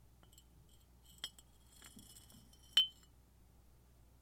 empty bottles bumping together
Two empty beer bottles lightly scraping against each other. Recorded with Audio-Technica AT2035 mic. No audio enhancements added.
beer, bottles, bump, bumping, clank, clanking, clink, clinking, empty, glass, metallic, scrape, scraping, two